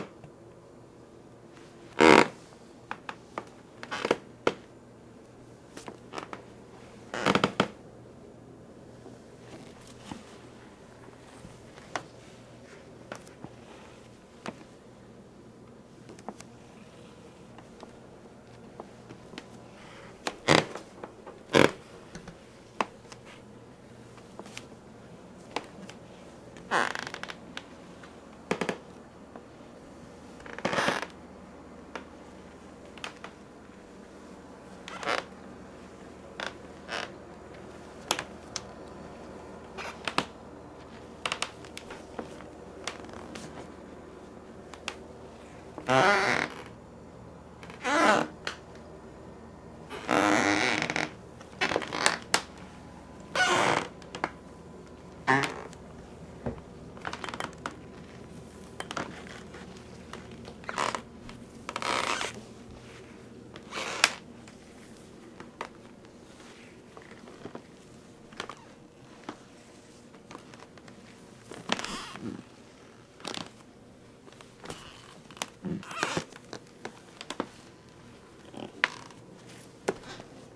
wood floor creaks